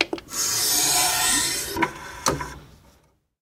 MAC LABS CD TRAY 012
We were so intrigued by the sound that we felt we had to record it. However, the only mics in our collection with enough gain to capture this extremely quiet source were the Lawson L251s with their tube gain stage. Samples 15 and 16, however, were captured with a Josephson C617 and there is a slightly higher noise floor. Preamp in all cases was a Millennia Media HV-3D and all sources were tracked straight to Pro Tools via Frontier Design Group converters. CD deck 'played' by Zach Greenhorn, recorded by Brady Leduc.